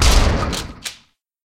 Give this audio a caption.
Original Gun sound Design using metal gates, wooden blocks, and locks.